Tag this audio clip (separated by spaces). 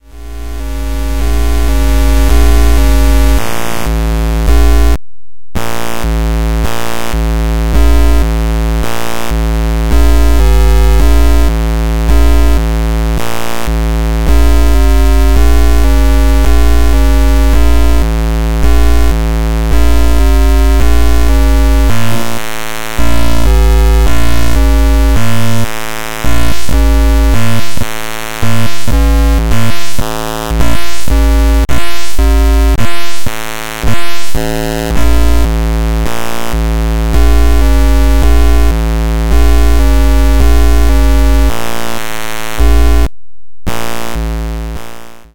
Buzz Buzzing Noise Artificial Machine Machinery Industrial Factory